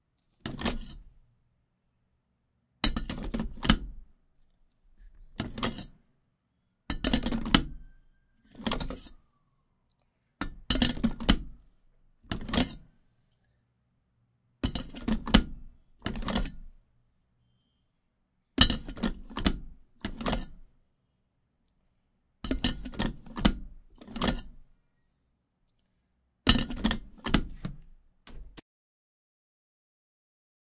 Coffee pot in and out of maker, several samples
brewing, coffee, pot